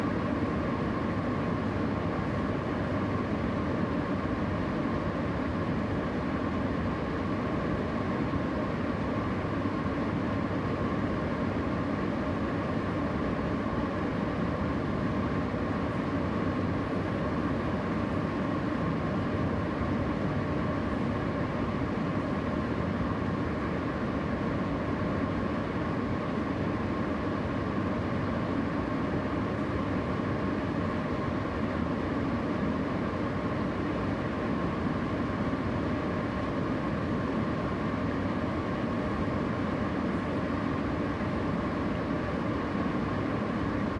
Heating System Fan noise
Sound of fan noise of a 'Clivet' (the brand) office heating system.
sound recorded with Neumann KM105->Behringer Xenyx QX1202->MacBook Pro audio card
heat, fan, blow, heating, system, noise, clivet